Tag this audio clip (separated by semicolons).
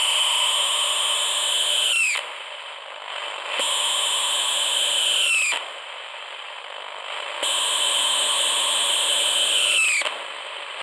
distortion; noise; pulsating; interference; shortwave; industrial; radio; white-noise; am; electronic; frequency-sweep